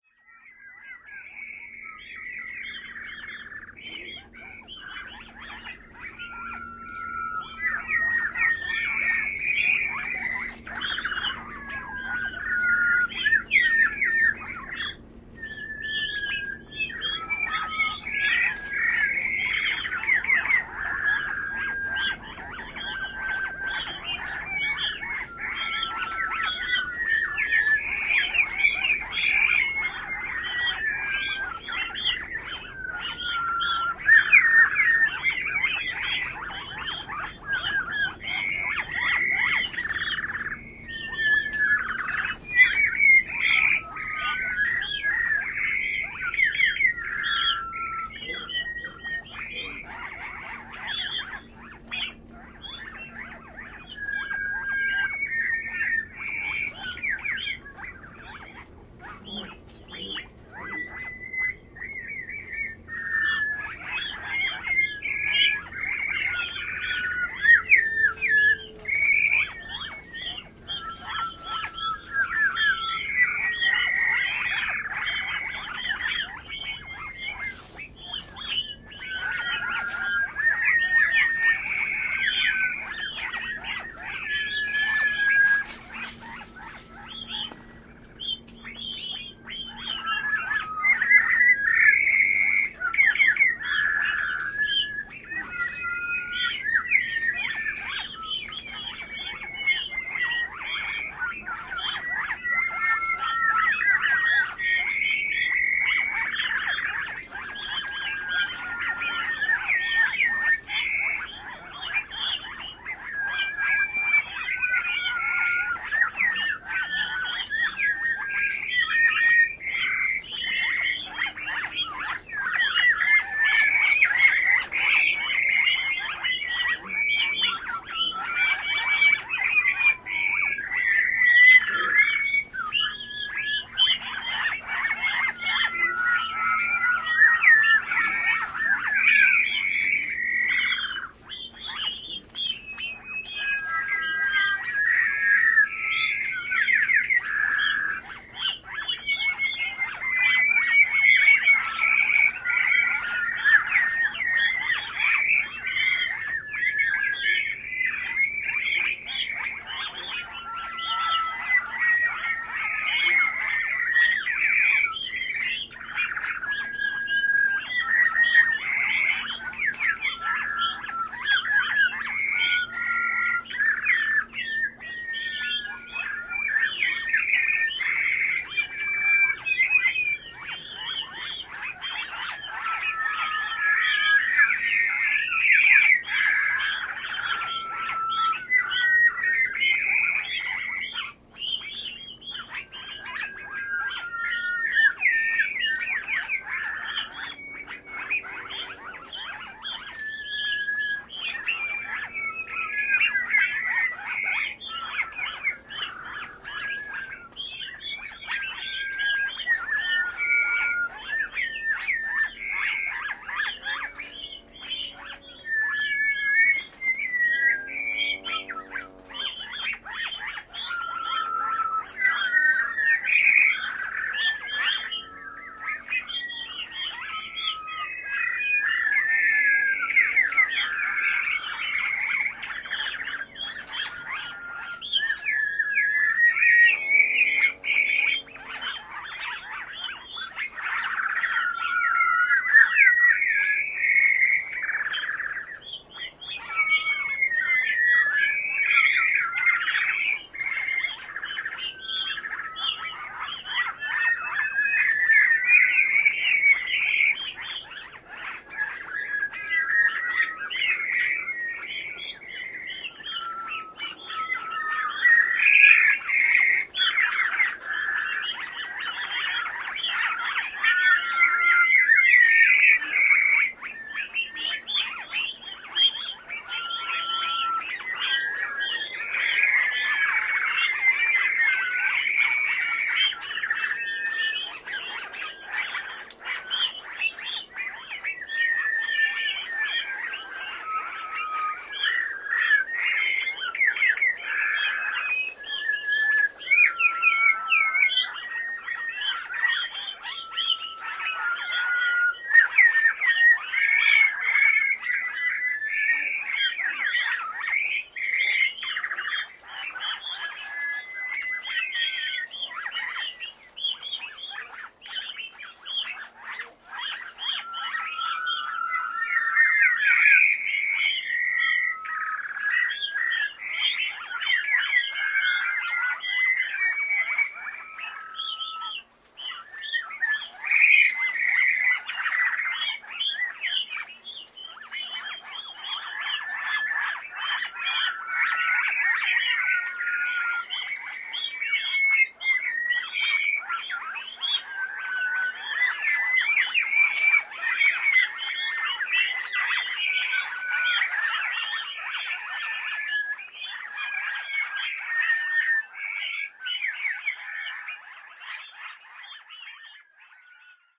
TS Track01